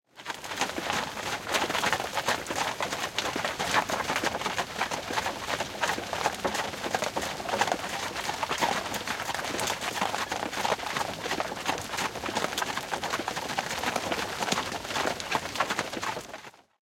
Horsewagon steady wheel
Horsewagon from 18th century
exterior, horse, wagon, wheels